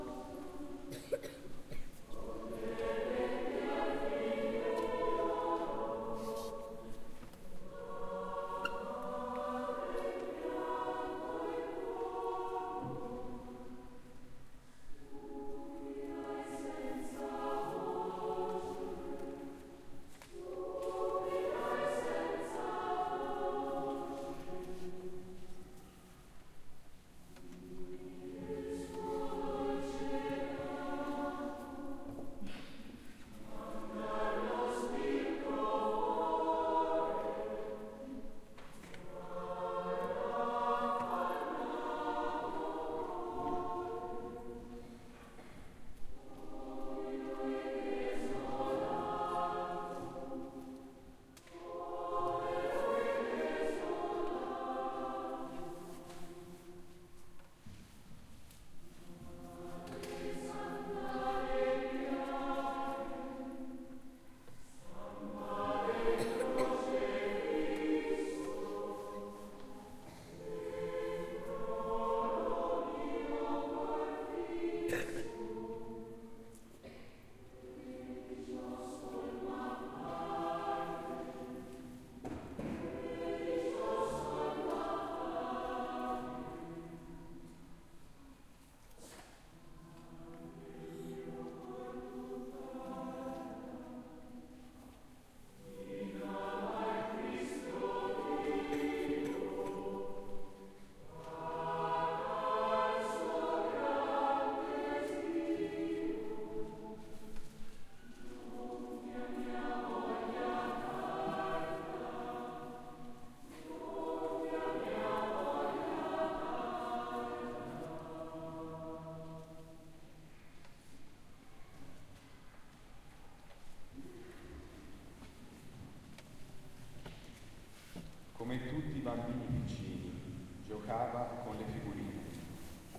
firenze church choir
choir in a church in florence